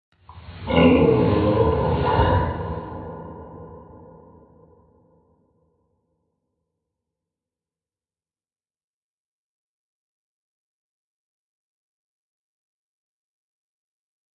This pack of 'Monster' noises, are just a few recordings of me, which have lowered the pitch by about an octave (a B5 I think it was), and then have processed it with a few effects to give it slightly nicer sound.
beast, beasts, creature, creatures, creepy, growl, growls, horror, monster, noise, noises, processed, scary